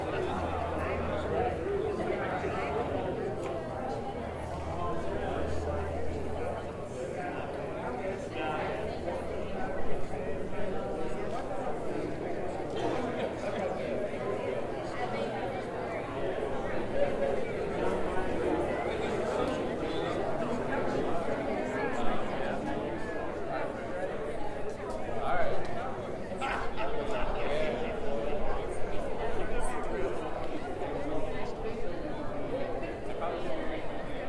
Generic Crowd Background Noise
Just a large group of people talking in an amphitheater after a wedding ceremony, recorded with an H4N. I really liked the acoustics and felt like it would work well for background sound.
If you feel like saying "thanks" by sending a few dollars my way you can definitely do that!